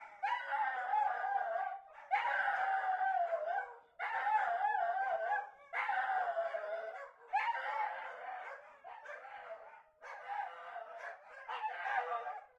Dogs Howling Barking
Dogs howling and barking after they see that their owner is home.
Three, calling, Eager, Howling, Barking, Happy, Dog, Excited, Dogs, Outside, Crying, OWI